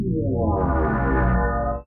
Warp SFX
A gnarly Warp/Teleport sound